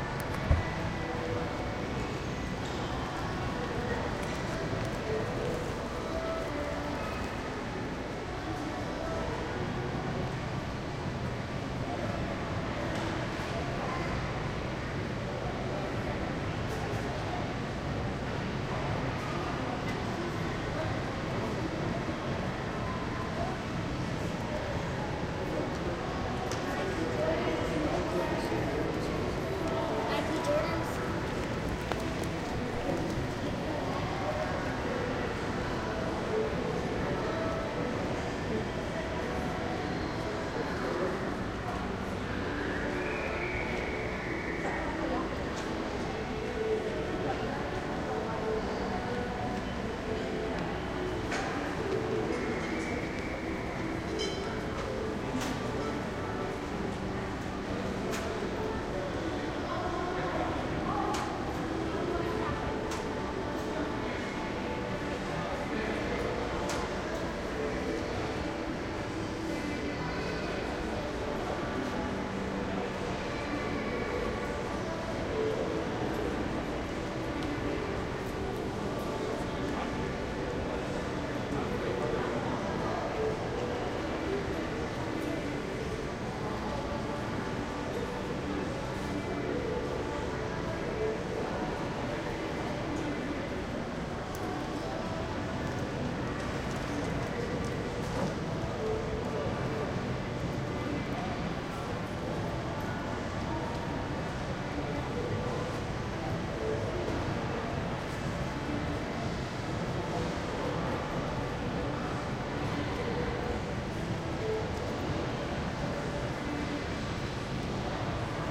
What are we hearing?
BenCarlson&MattPrince MallRecording Sat 4.2 #4
Recorded on the first floor bench in North Park Mall on Saturday, April 2, 2011 at 11:45 AM
Temporal Density: 5
Polyphony Density: 3
Business: 4
Order(0) to Chaos(10): 4